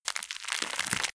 Wrapper Flare / Pop 07
Wrapper Flare / Pop
pop, crackling, pops, popping, crackles, noises, crackle, noise